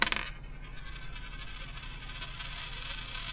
Spinning dime on desk recorded with radio shack clip on condenser.